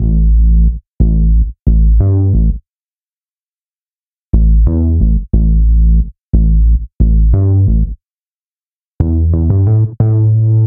Winter Bass 6
jazz, music, jazzy